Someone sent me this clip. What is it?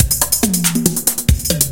70 bpm drum loop made with Hydrogen
beat, electronic